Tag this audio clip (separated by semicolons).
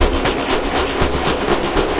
60-bpm; space; loop; dub; deep; dubspace